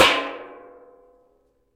a metal tray is struck with a metal ruler. recorded with a condenser mic. cropped and normalized in ReZound. grouped into resonant (RES), less resonant (lesRES), and least resonant (leaRES).
atonal, metal, percussion